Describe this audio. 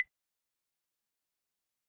percussion sound in Dminor scale,...
itz my first try to contribute, hope itz alright :)